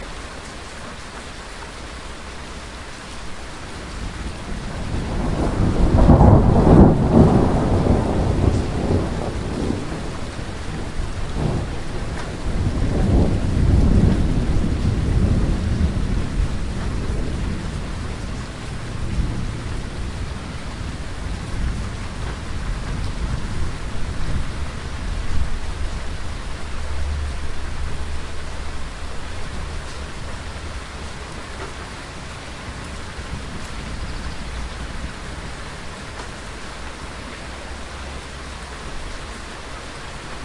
A sustained, distant, fairly striking thunderclap in the middle of a heavy rainstorm, recorded from the second floor window of a town house about half-a-mile from a small airport.
aeroplane, distance, rain, storm, thunder